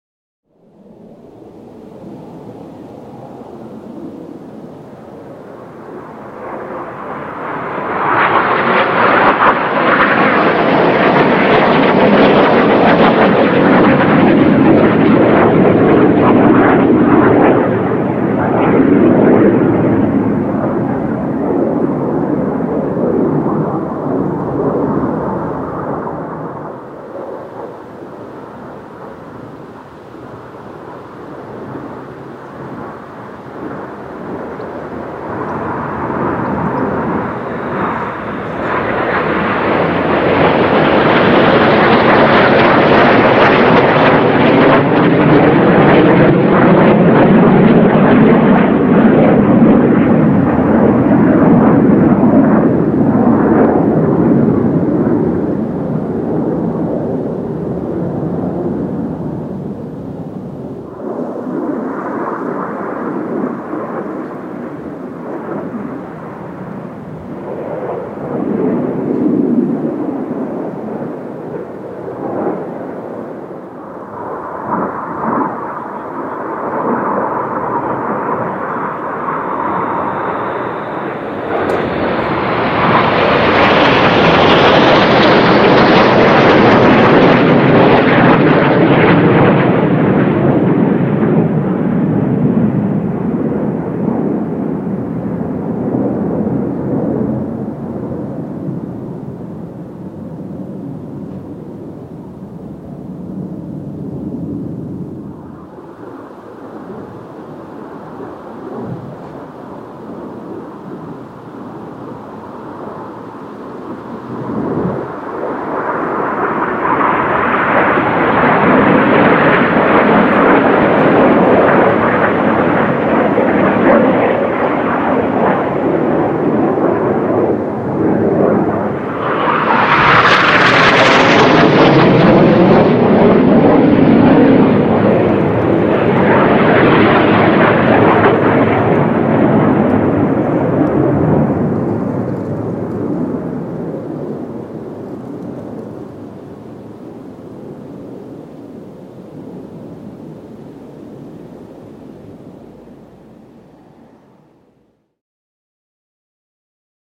Red Arrows Jet Flyovers
The RAF Red Arrows Display Team recorded at the Royal International Air Tattoo, Fairford, UK. 15 July 2018
Air-Tattoo, Fighters, Jets